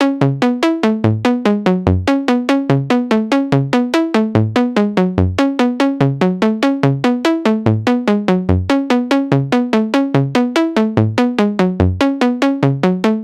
Dry Saw
Music, Song, Track